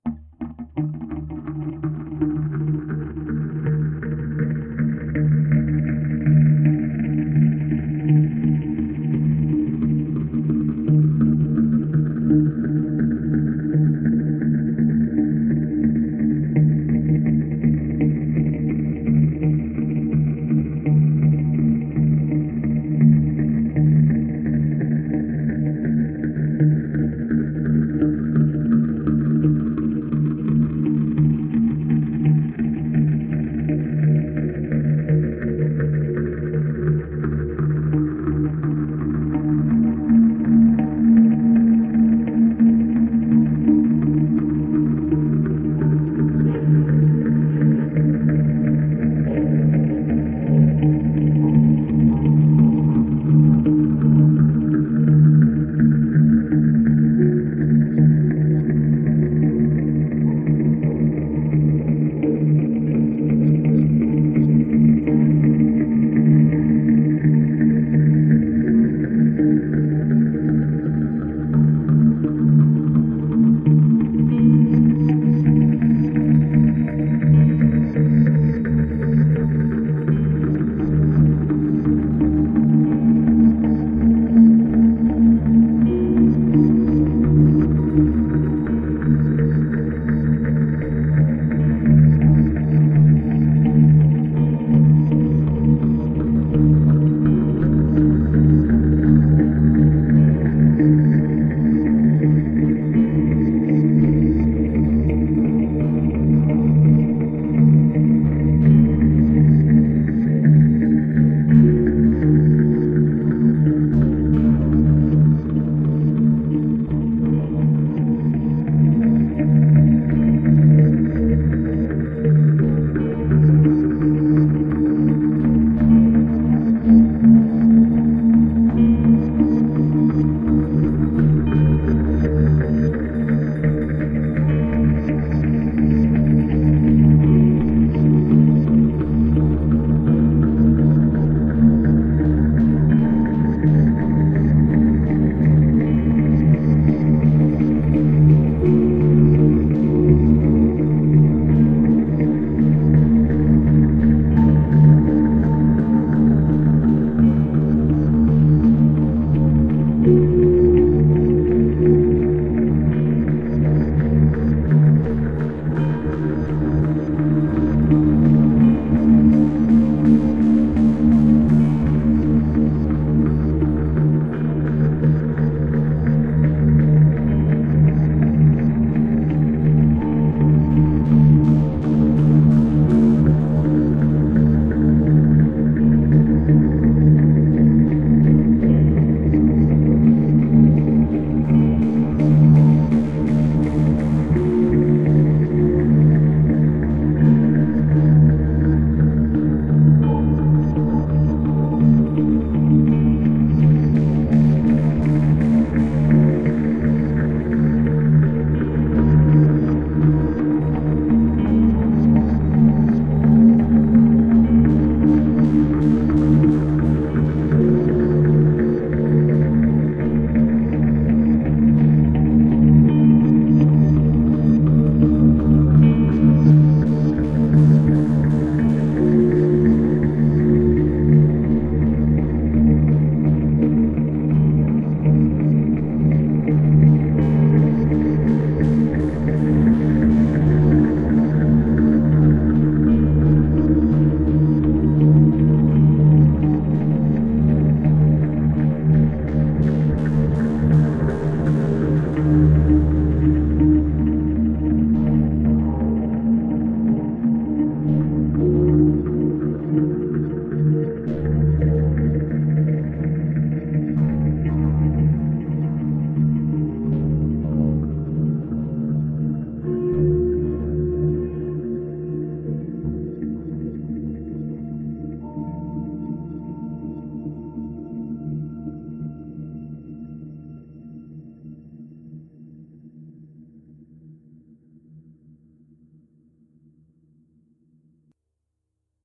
A music by Dead Tubes Foundation.
Created with guitar (Phil Pro) and bass (Cort Action V)
Guitar recorded by Shure PG58 microphone and DIY mic preamp
Bass recorded into PC Line In
Amp used: Fender Champ 5F1 clone (DIY stuff)
Also used DIY booster to overdrive amp
Software phaser, reverse, reverb and delay in postproduction
Software used: Audacity (free)
Some noises made in Audacity in postproduction
background-sound, haunted, anxious, ambient, terror, phantom, bogey, suspense, dramatic, scary, spooky, atmos, terrifying, creepy, Gothic, background, sinister, thrill, drone, scinematic, macabre, weird, nightmare, film, bass